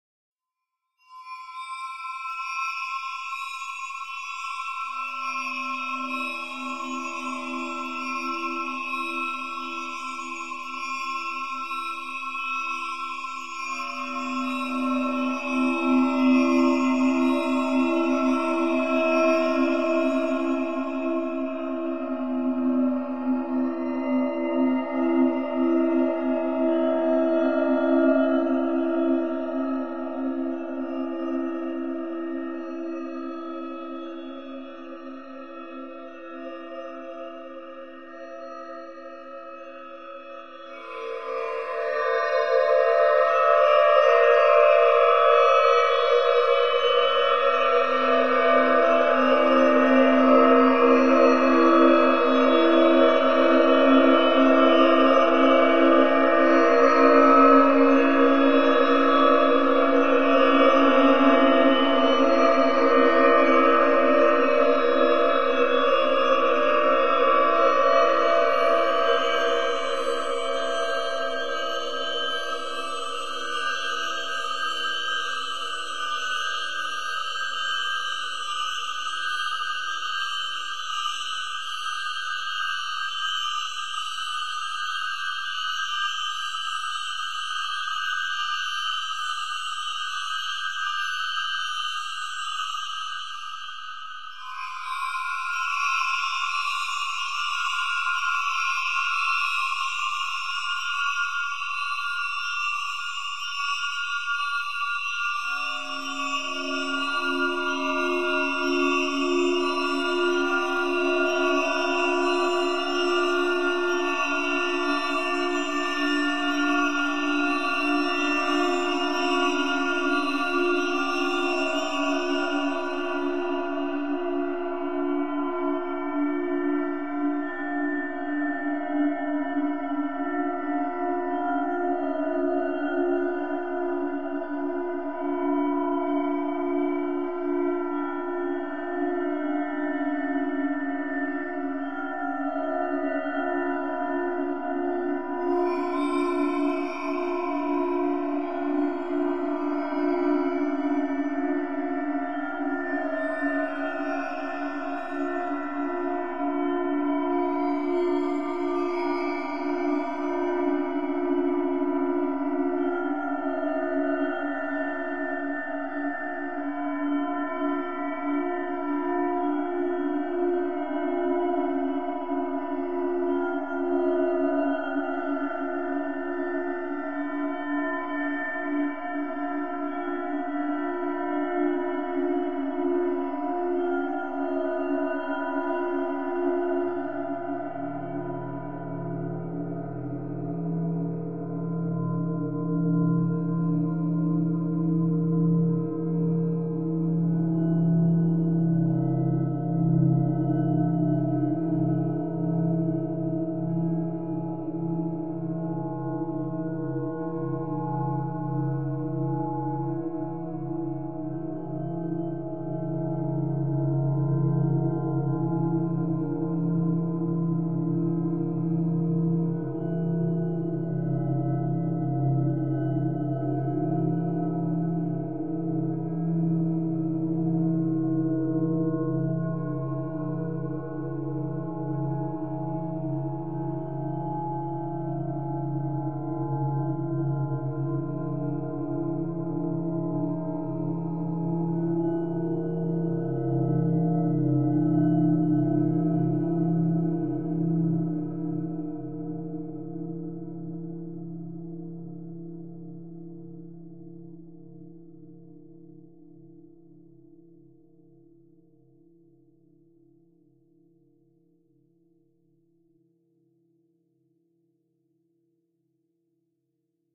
Glass Aquaphone Drone 2
An evolving, resonant drone, derived from bowed water phone and resonant wine-glass sounds. Processed with granular synthesis and edited in BIAS Peak.
ambient, drone, evolving, glassy, metallic, resonant, soundscape, space